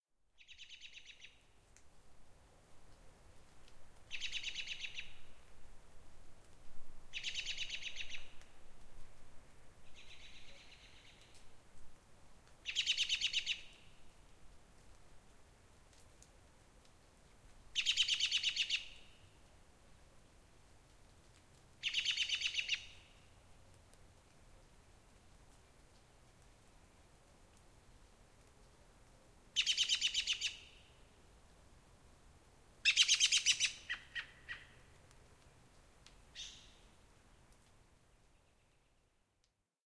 American Robin (Turdus migratorius) alarm call.